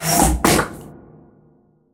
Zoom Whip Hit Collision Reverb
A hard to describe sound that might be useful for games, in particular pinball machines and similar arcade games.
Calf Reverb used in Audacity.